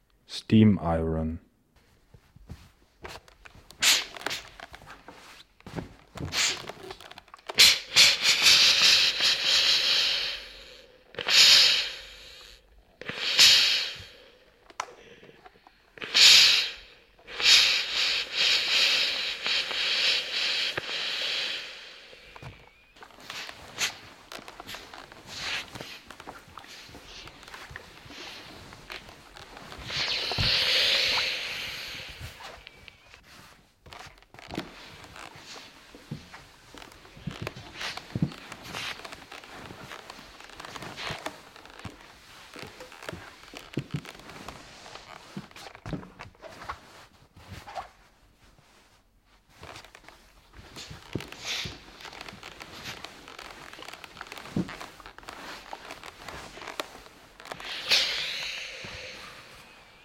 Steam Iron used on board
Ironing with a steam iron